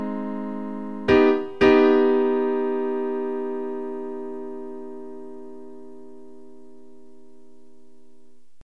Piano Jazz Chords